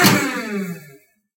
Dumpster Shutdown
(CAUTION: Adjust volume before playing this sound!)
A separate sound clip from "Dumpster_Press_2" mostly rendered as the end when the machine is finished and shutting down.
Compressor, Dumpster, Factory, Machine, Machinery, Mechanical, Sci-Fi